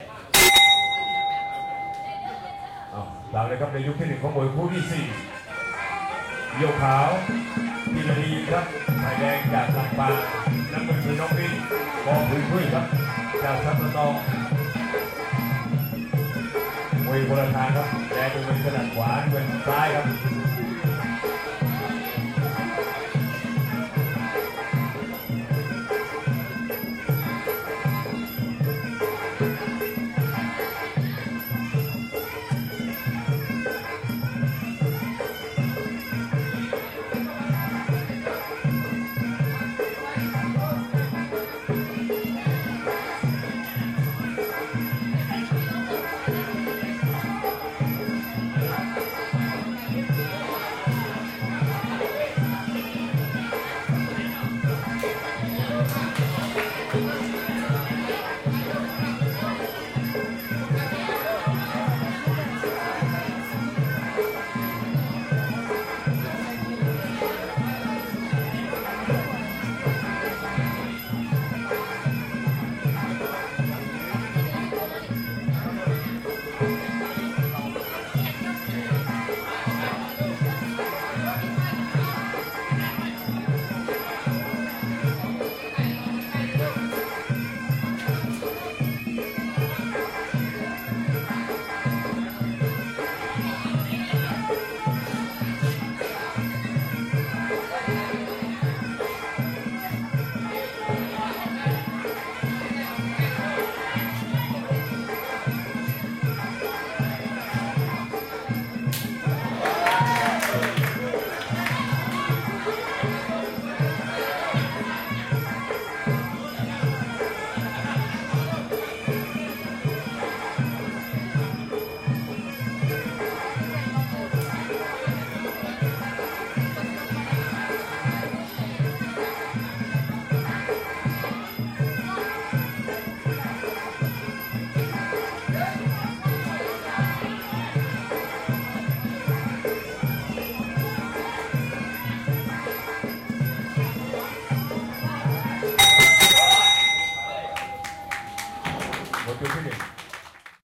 Muay Thai fighters Round 1
A Round of Muay Thai with traditional thai music.
thailand, recording, field, kickboxing